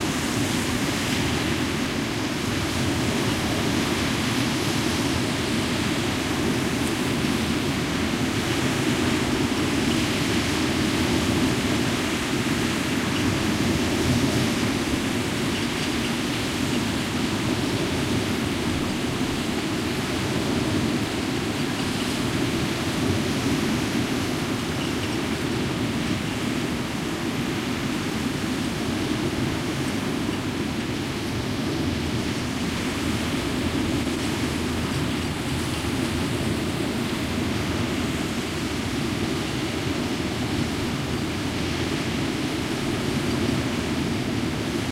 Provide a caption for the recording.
The sound of a beach in Noirmoutier in September 2022. Recorded by me on a Tascam DR-05.